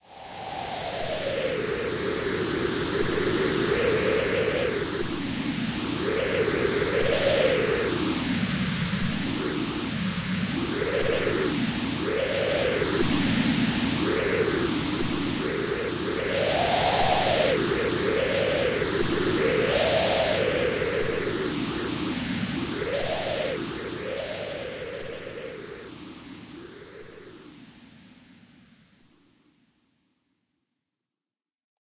Wind: i recorded a white noise in my sequencer then i passed a natural wind noise in a matching eq i apply the borrow of it on the white noise then i passed the white noise processed in a wha wha filtering plug in . to make the sound move i apply the automation parameter to the envelope shape and the envelope release of the filter then i played with them to make the sound more natural..
i put AN EQ on the master to finalize and a little bit of convolution reverb to widen the spectre.
it was all mixed and processed in ableton live with a little finalisation with peak and a limiter.
iced, wind